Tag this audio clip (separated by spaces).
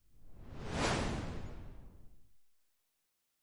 fast pass-by whoosh gust fly-by swish swoosh wind air